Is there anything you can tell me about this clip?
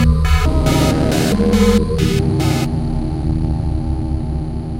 PPG 013 Non Harmonic Rhythm G#1
This sample is part of the "PPG
MULTISAMPLE 013 Non Harmonic Rhythm" sample pack. The sound is a
complex evolving loop in which the main sound element is a dissonant
chord that has its amplitude modulated by an LFO
with rectangular shape. The result is a sort of rhythmic sound or
melody. In the sample pack there are 16 samples evenly spread across 5
octaves (C1 till C6). The note in the sample name (C, E or G#) does not
indicate the pitch of the sound but the key on my keyboard. The sound
was created on the PPG VSTi. After that normalising and fades where applied within Cubase SX.
experimental, multisample, ppg, rhythmic